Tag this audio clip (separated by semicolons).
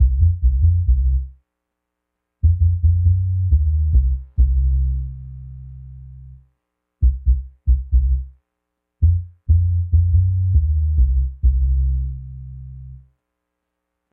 Reggae; Roots